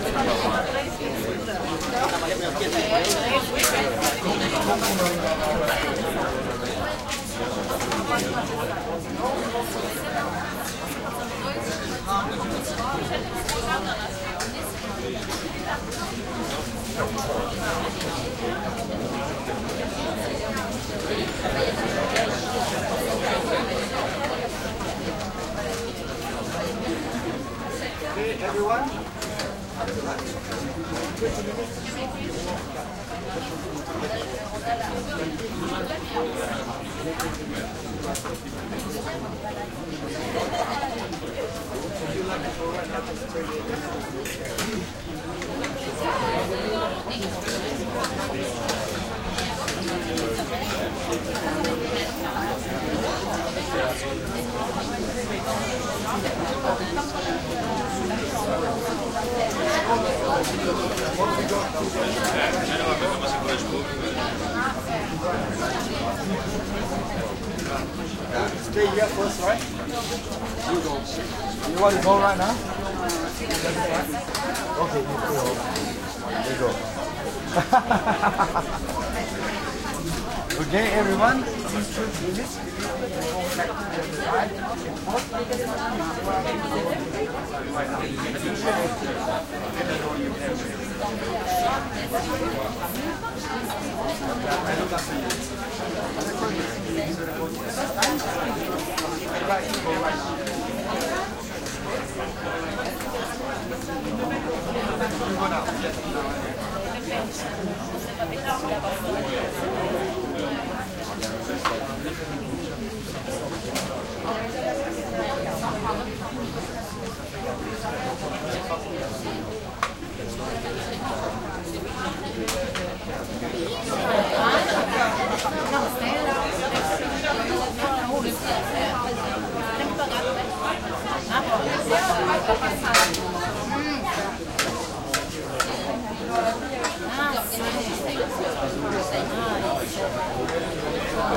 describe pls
Thailand crowd ext medium restaurant Phuket covered eating area heavy walla murmur and metal serving sounds + Thai tour guide in english walking around talking to group